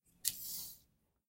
paper slide
me sliding a paper
paper, slide, table